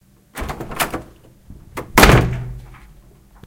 Recorded with a black Sony IC digital voice recorder.